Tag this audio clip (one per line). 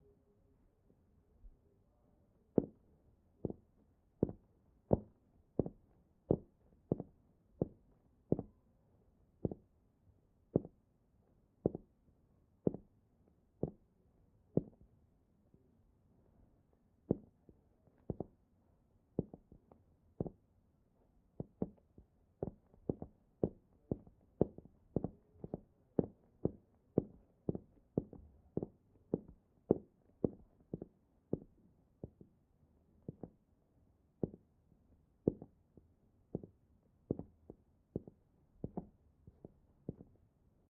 pasos
wood
footsteps
steps
walking